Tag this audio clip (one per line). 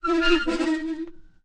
chair
MTC500-M002-s13
pitch
rusted
shifted
squeak
swivel